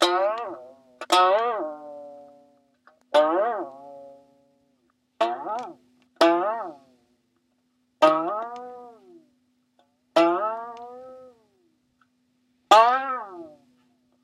Cartoon Spring Bouncing 01
broken string from a Turkish banjo that i tense and distend manually to pitch up and down
gaw, bounce, strings, bouncing, boing, bend, spring, cartoon, bending